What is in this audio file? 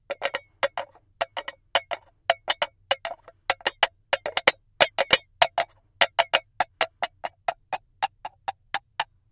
Two coconut shells clacked together to sound like a galloping horse on cobble stone.